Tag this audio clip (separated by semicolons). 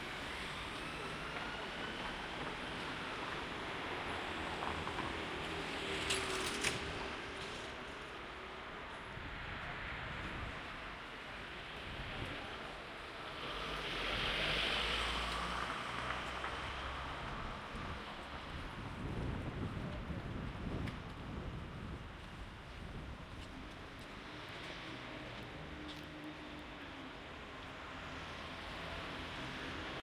binaural; qmul; ambience; field-recording